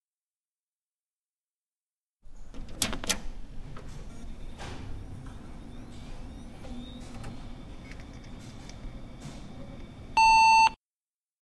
This sound is produced when switch on a PC. This sound was recorded in a silent environment and the recorder was near to the source in the library of UPF.